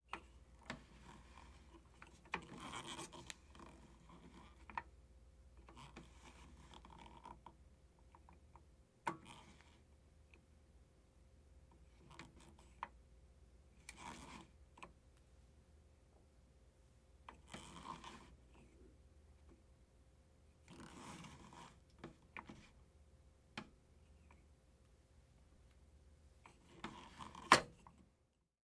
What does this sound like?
Overhead Projector Focus Knob
Twisting to adjust the focus knob of an overhead projector.
knob, focus